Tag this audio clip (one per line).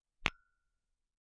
short
glass
dry
ornament
tap